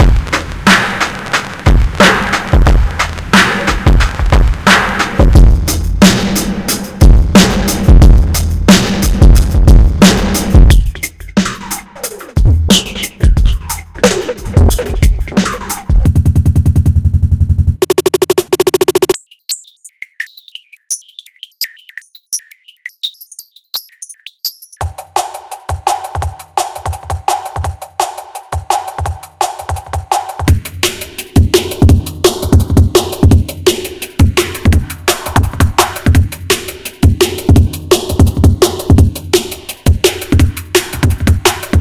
Zajo Loop22 02 allinone-rwrk
a few experiments processing one of the beautiful hip-hop beat uploaded by Zajo (see remix link above)
all variations in one file, (also available as individual loops)
drumnbass, loop, beat, processing, pitch, dj, downtempo, idm, mix, drum, drum-track, compression, hiphop, filter, breakbeat, dub, dirty, glitch, percussive, heavy, distorsion, liquid, dnb, club, phaser, construction-kit, hard, double-tempo, electro, phat